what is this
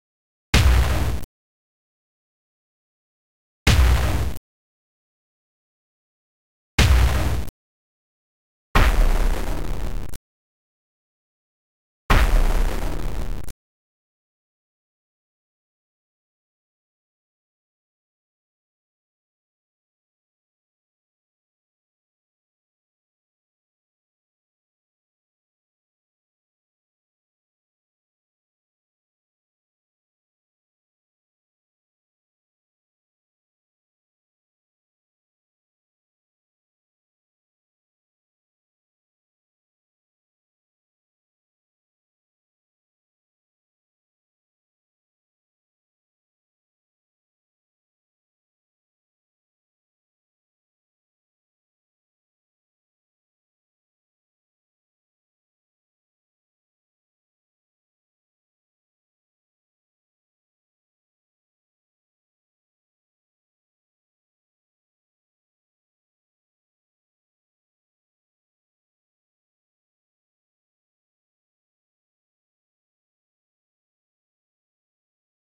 heavy hit grunge
used this for the atmos in a fight scene
agression, army, attack, attacking, battle, caliber, design, explosion, fight, fighting, grenade, military, projectile, scene, shot, sound, suspense, war